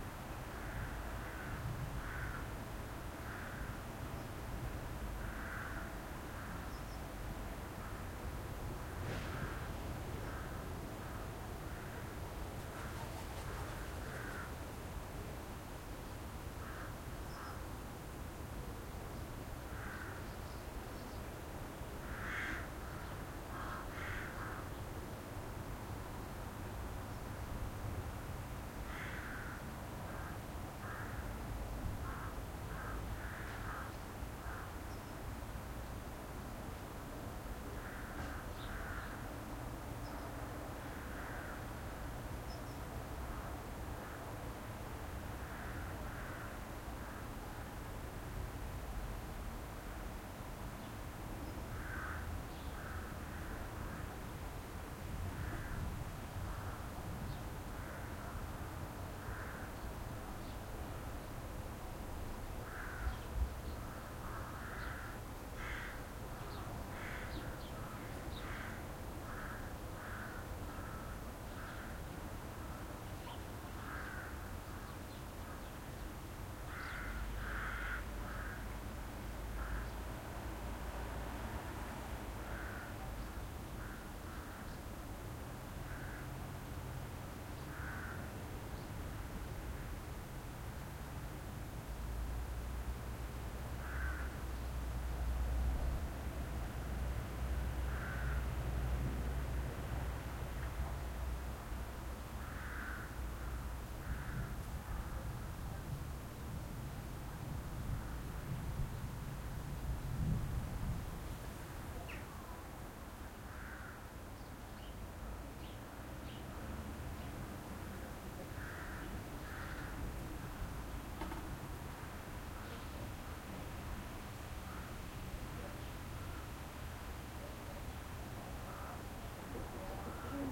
210830 Femahrn BackyardQietMorning F
Wide angle recording of a quiet afternoon in a small neighborhood in Fehmarn, a large island off the West German Baltic Riviera. It is sunny and warm, diverse crows and seagulls can be heard in the background.
The recorder is exactly at and about 1 meter above the shoreline.
These are the FRONT channels of a 4ch surround recording.
Recording conducted with a Zoom H2n.
ambiance, neighborhood